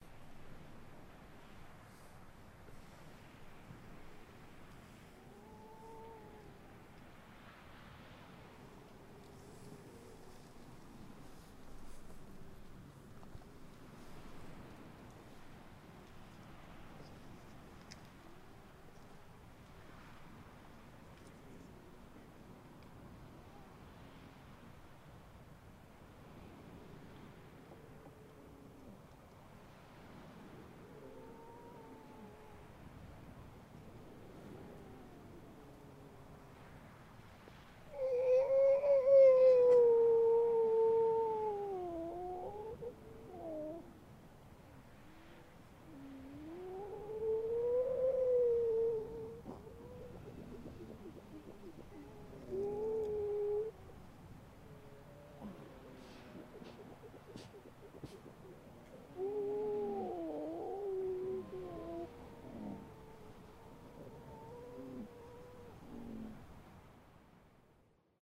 Grey Seal
Young gray seal on the beach of beautiful heligoland in the german north sea looking for his mom. Recorded with a Sennheiser MKE600 and a Rode Blimp with a Dead Wombat using a Tascam DR100 MK2